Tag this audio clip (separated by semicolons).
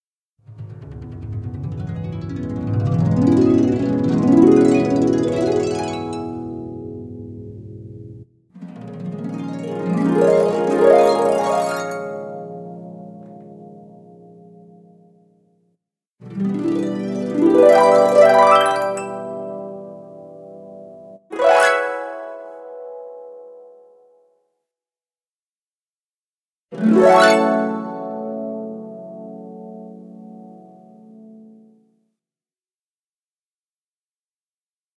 glissando; harp; music